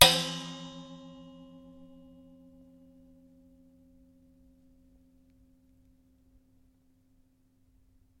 found spring hit
A metallic spring hit